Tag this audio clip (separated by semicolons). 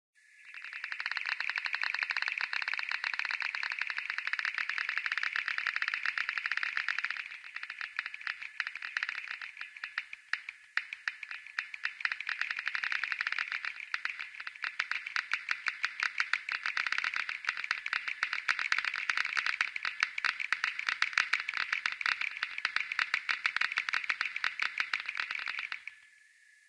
clicks; geiger-counter; radioactivity; sound-design